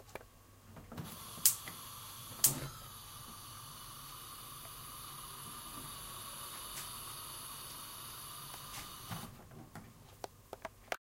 SonicSnaps MB Leroy
cityrings, soundscape, mobi, belgium, sonicsnaps